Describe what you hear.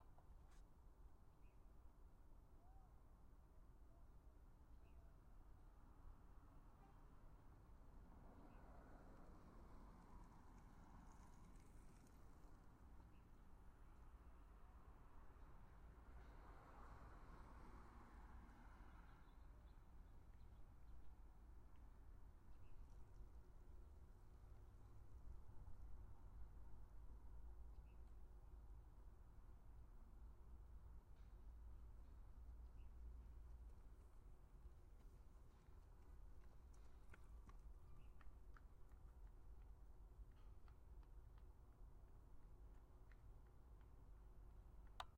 Omni Ambiental Sidewalk
Ambiental, place, public, sidewalk